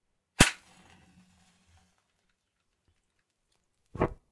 A dual mono recording of a match being lit and blown out. Rode NTG-2 > FEL battery pre-amp > Zoom H2 line in.